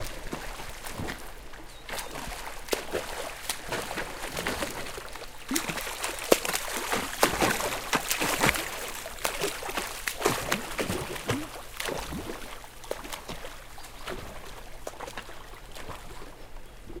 POOL SWIMMING L-R
-Swimming and paddling in pool, left-to-right
waves, water, splashing, splash, swimming, paddling, swim, paddle, pool